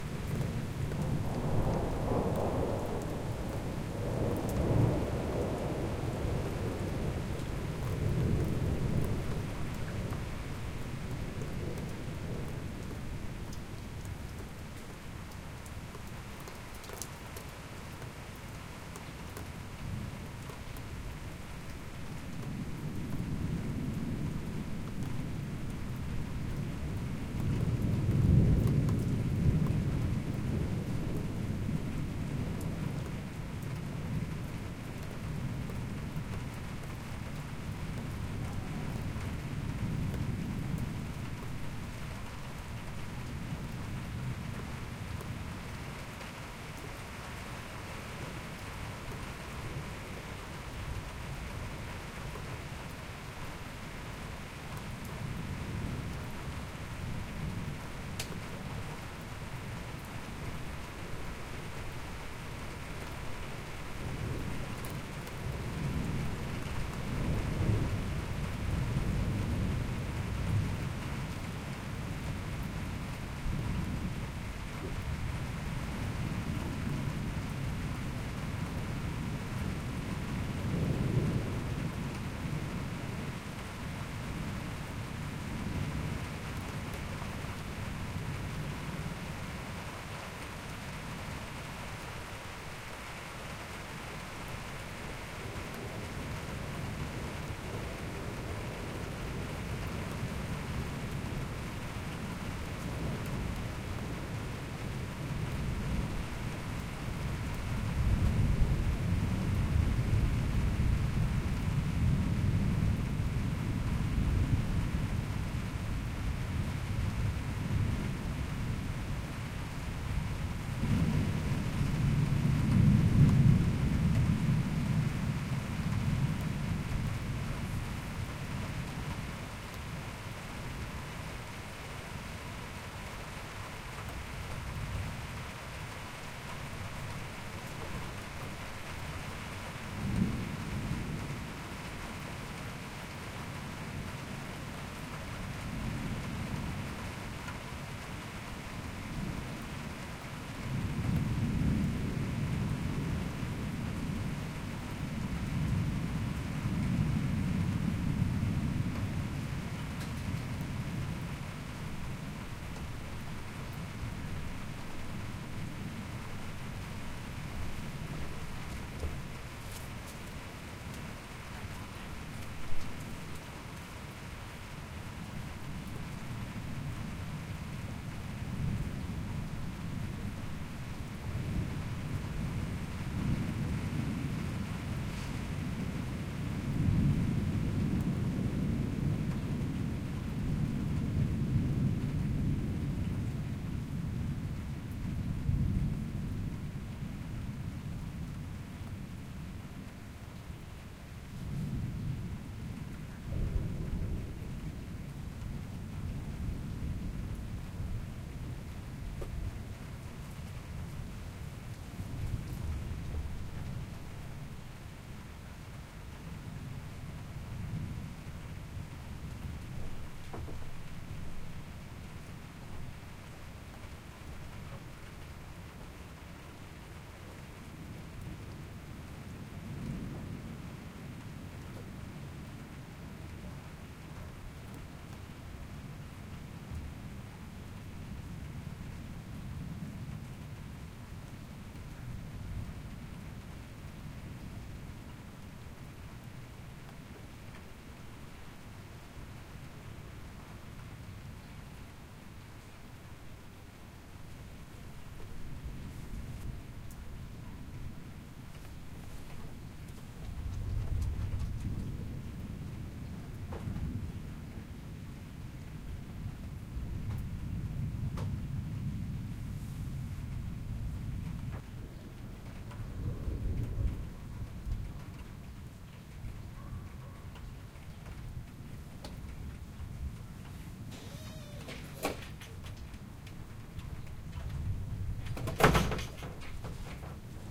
Heavy Rain in a Thunder Storm 04
Huge thunderstorm with constant rumbling. Lots of rain and thunder. Recorded outside but from under a roof.
Be aware that there might be unwanted noise towards the end (footsteps, my dog walking, etc), but there's a lot there to work with.